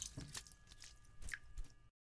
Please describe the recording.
shaking water off hands
hands, shaking